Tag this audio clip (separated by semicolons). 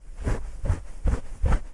finger; fingernails; itchy; scratching